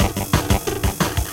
7x7tks&hesed3(45)
707, beat, bend, loop, modified